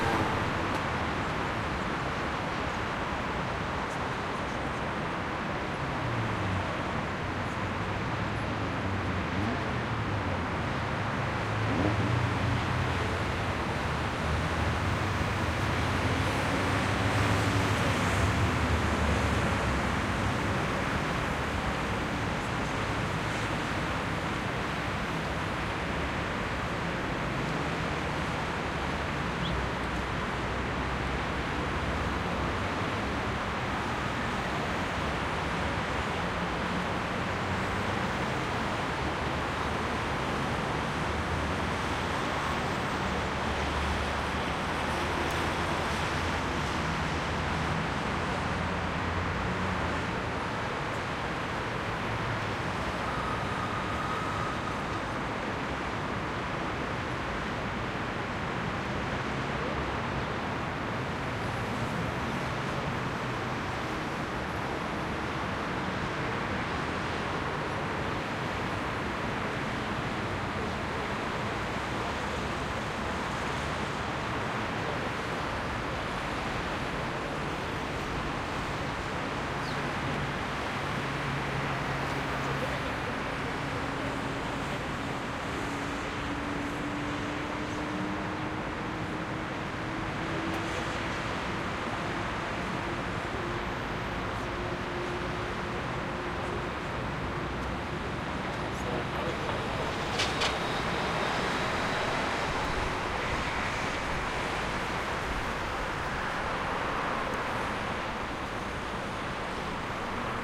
skyline traffic distant general throaty haze rooftop nice Marseille, France MS (also good for quick auto pass by whooshes)
distant, France, general, haze, Marseille, rooftop, skyline, throaty, traffic